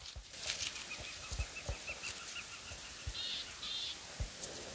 Quiet short forest sounds
Some random sounds from a forest trip I took today. If you like my sounds - check my music on streaming services (Tomasz Kucza).
nature, forest, birds, spring, ambient, birdsong, ambiance, field-recording